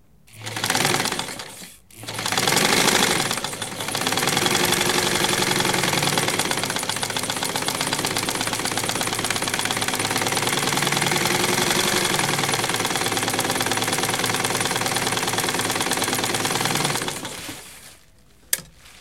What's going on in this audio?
maquina de costura
máquina de costura; sewing machine.
sewing, motor, mquina